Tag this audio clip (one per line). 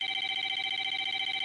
beep
score
beeping
beeps
dings
ding
video-game
count
tally
dinging
game